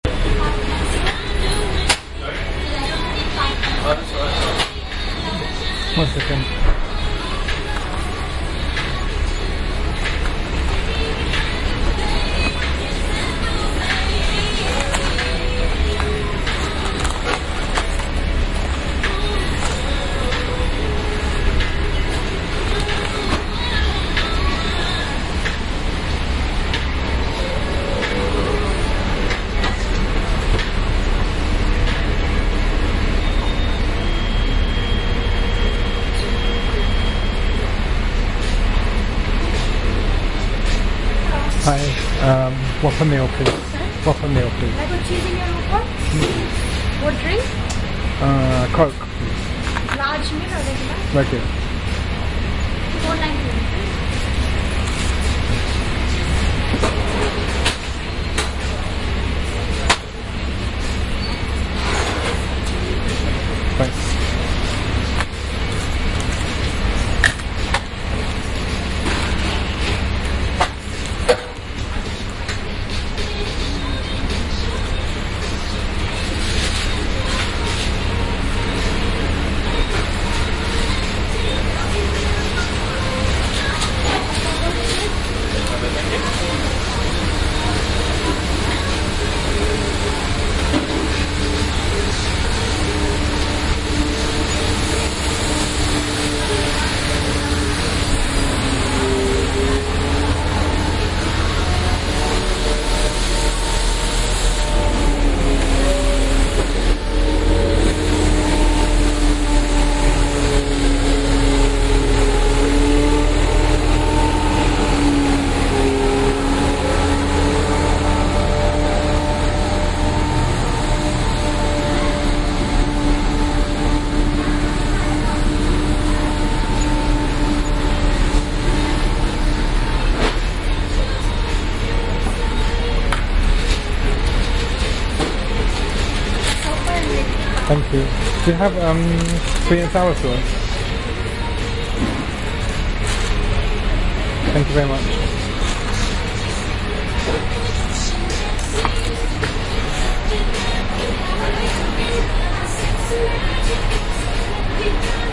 Fenchurch St - Burger King:Street cleaning
ambiance, ambience, ambient, atmosphere, background-sound, city, field-recording, general-noise, london, soundscape